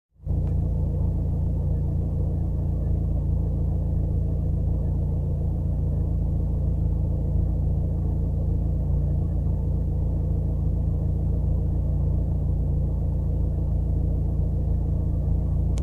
cellar wind tube
black cellar soundscape